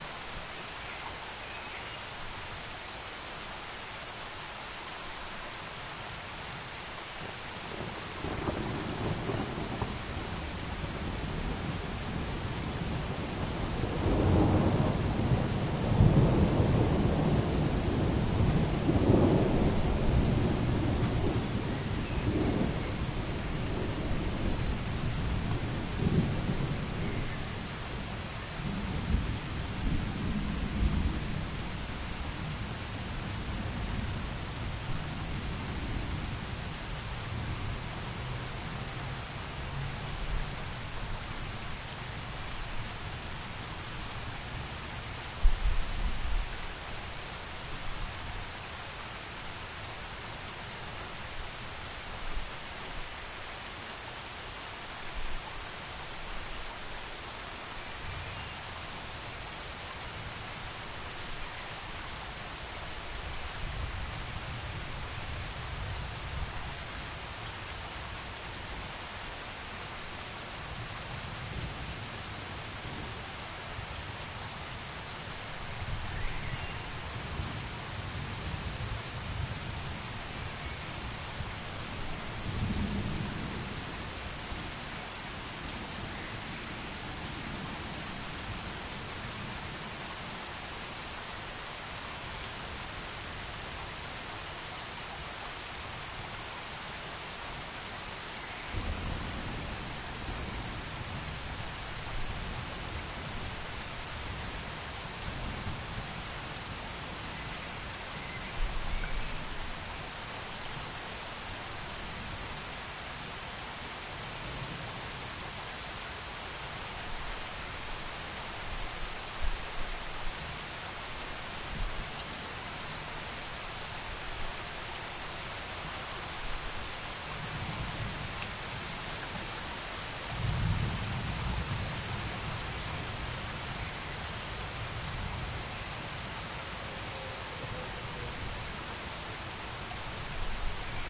Summerstorm Munich2 LR
Awaken by a summerstorm at 5 in the morning -- I used the opportunity to record some nice rain with thunders in the back...Hope it is useful. Adapted the controllers sometimes in beetween - so listen carefully and don't be surprised by some volumeshifts...
Donner, field-recording, film, noir, normal, rain, rainstorm, Regen, Sommergewitter, Summerstorm, thunder, thunderstorm